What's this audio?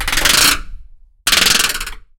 record in garage